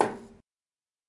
Tuba Percussion - Tom Mid
Substitute mid tom sound made by breathing through a tuba. Made as part of the Disquiet Junto 0345, Sample Time.
drums, low, percussion, percussive, tom, tuba